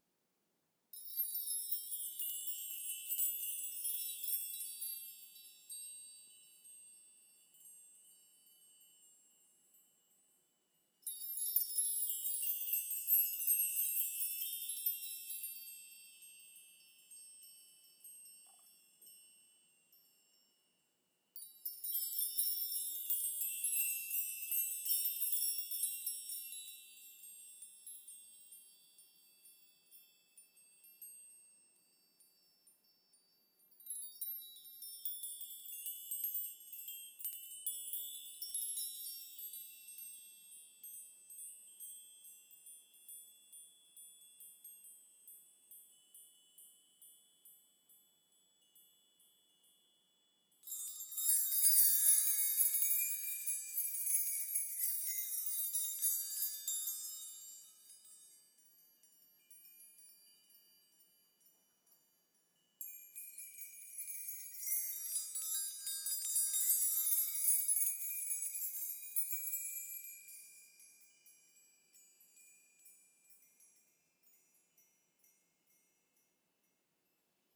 Key Chimes 07 High-Low-High
Close-mic of a chime bar made from various size house keys, strumming from high to low back to high pitch. This was recorded with high quality gear.
Schoeps CMC6/Mk4 > Langevin Dual Vocal Combo > Digi 003
airy, chimes, ethereal, fairy, jingle, keys, magic, metallic, sparkle, spell, ting, tinkle, tinkles